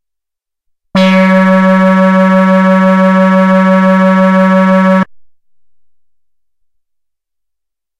SW-PB-bass1-F#3
This is the first of five multi-sampled Little Phatty's bass sounds.
moog, phatty, fat, envelope, little, synthesizer, analog, bass